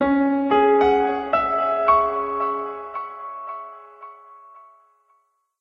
Minor climbing phrase, part of Piano moods pack.